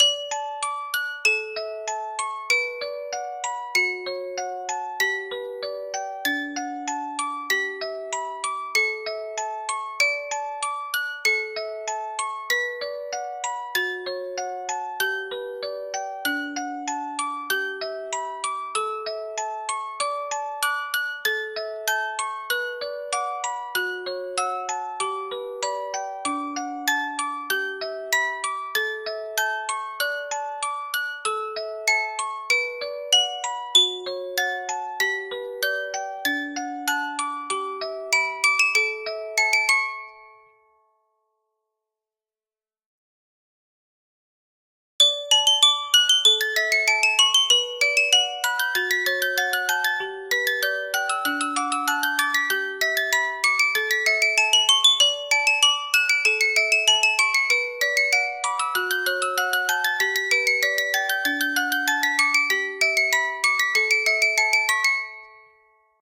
Music Box Playing Pachelbel Canon in D
antique, box, classical, historical, instrumental, mechanism, melancholy, music, musicbox, old